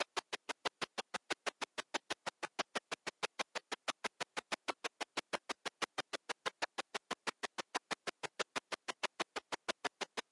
Here's a useful loop to add an extra spark to a beat